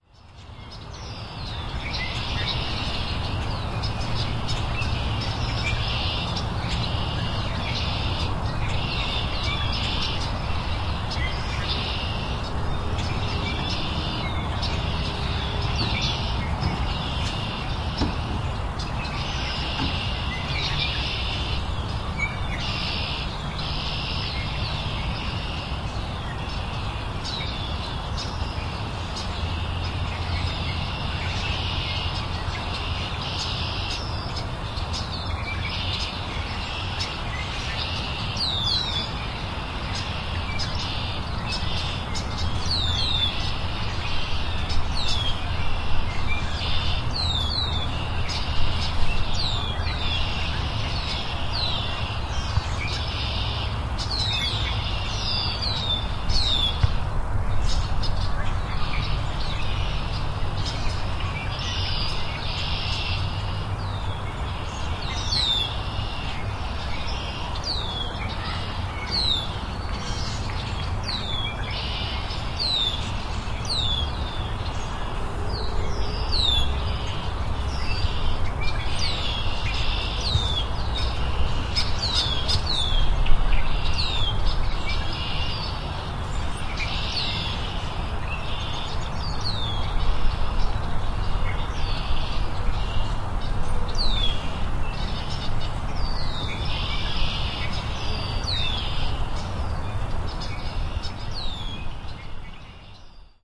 I went out to Lincoln Woods the day after Earth Day 2010 (the day after we had torrential downpours, lightning, and hail during a field recording excursion). In this recording the sound of Lincoln Woods (many birds in this case), is challenged by route 146 providing a constant underlying noise.
rhode-island, woods